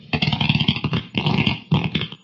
Sounds of bigger and smaller spaceships and other sounds very common in airless Space.
How I made them:
Rubbing different things on different surfaces in front of 2 x AKG S1000, then processing them with the free Kjearhus plugins and some guitaramp simulators.